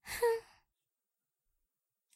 short sigh of a little girl
short sigh of a girl for video games clear and HD.
sigh, gamesound, vocal